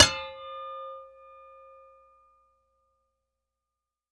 Shovel Thwack 2
Garden Shovel clanging as it is struck. I needed the sound of someone getting hit over the head with a shovel for a theatrical production [ Fuddy Meers ]. I recorded my garden shovel as I struck it with my shoe; quite effective.